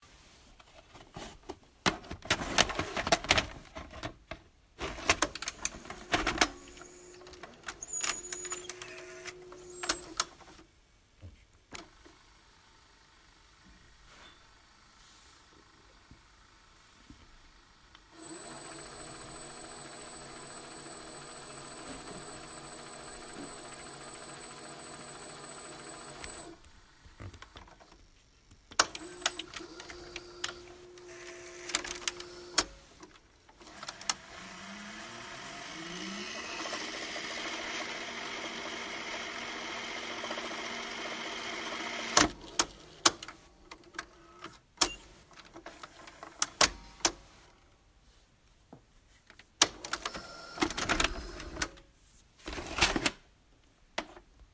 Loading a cassette to a vintage vcr (VHS) recorder. Plastic and mechanical sound